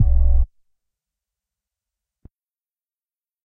Viral Granualized BD 02
Abstract, Noise, Industrial
bass, drum